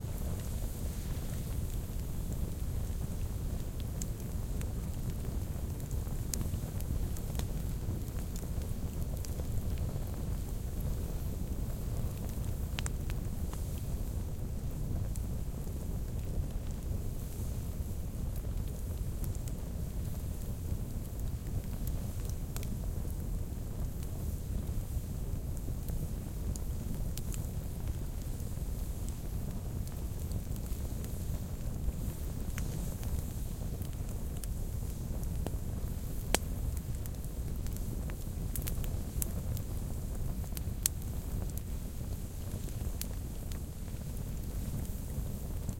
campfire in the open field 3
ambience, burn, burning, firewood, hiss